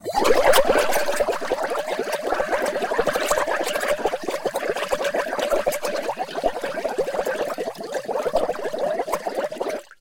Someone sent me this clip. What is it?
Bubbles Long
Long Bubbles sequence